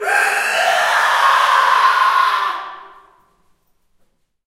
Male Scream 5
Male screaming in a reverberant hall.
Recorded with:
Zoom H4n